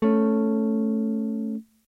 Jackson Dominion guitar. Recorded through a POD XT Live, pedal. Bypass effects, on the Mid pickup setting.
electric, strum